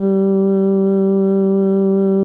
ooooooooooo 55 G2 Bcl
vocal formants pitched under Simplesong a macintosh software and using the princess voice
vocal, voice, formants